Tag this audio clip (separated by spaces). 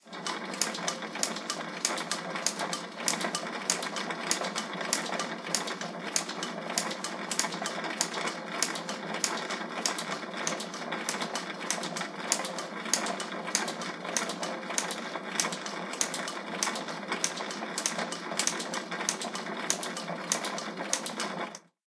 clunk machinery machine tick xy antique lathe clack rattle mechanical vintage